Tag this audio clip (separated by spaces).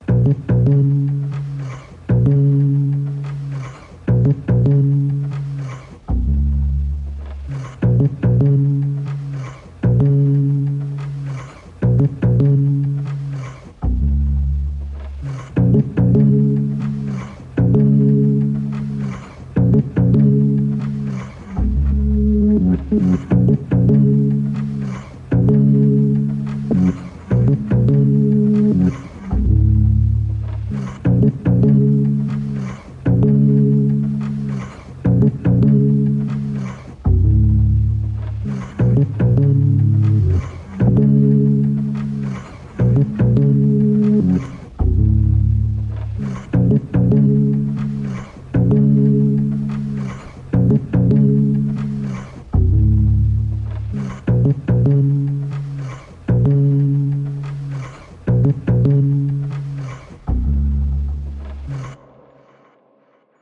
morphagene mgreel kimathimoore field-recording